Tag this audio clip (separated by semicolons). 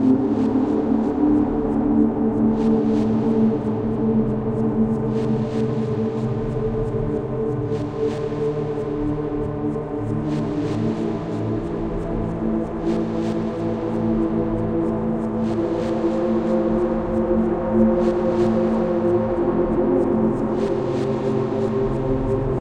085-bpm; ambient; experimental; loop; melodic; metaphysically; noise; processed